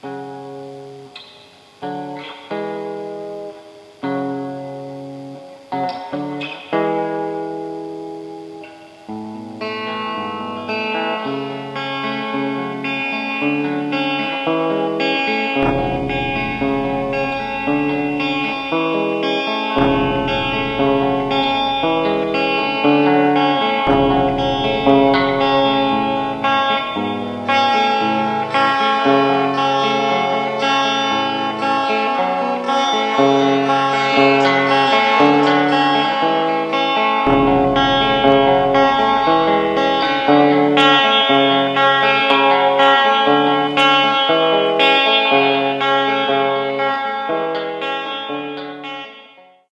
- take up a part of guitar piece
- generate a Pluck
- change the tempo
- change the height
- change the speed
- repeat
- select a part for insert a other pist
- change the gain
- change the height
- blend a overture
- blend a closing
- normalize